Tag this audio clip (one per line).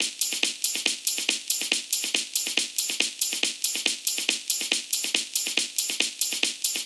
beat
dance
electronica
loop
processed